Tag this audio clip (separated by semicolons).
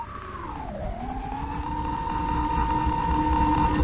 abstract; ambience; analog; atmosphere; buzz; cinematic; circuit; circuits; creative; digital; effects; electric; electricity; Eurorack; fiction; futuristic; fx; glitch; hardware; industrial; interface; modular; movement; noise; science; sci-fi; space; spacecraft; special; ui